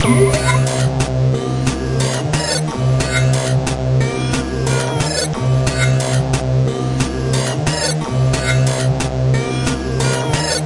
Creepy industrial loop (2 bars). Major part of this loop is made with one sample (different tempi and different effects applied), no synths this time. Made with a tracker.
creepy, 2bar, loop, industrial, depressive